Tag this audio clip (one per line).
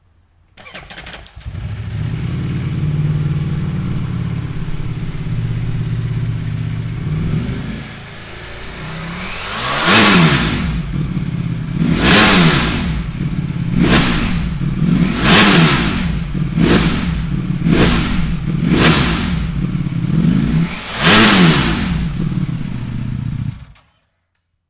engine
ducati
rev
motorcycle
v4
motorbike
harley-davidson
bike
panigale
recording